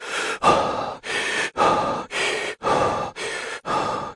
Heavy Breathing4
Heavy breathing by a man.